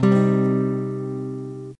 Yamaha F160e Acoustic Electric run through a PO XT Live. Random chord strum. Clean channel/ Bypass Effects.
chord, guitar, strum